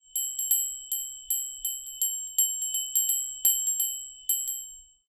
small bell 2
small bell, wchich is sometimes used like calling to meal